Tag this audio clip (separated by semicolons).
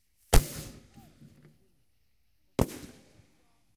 fireworks; pop; whiz; crackle; bang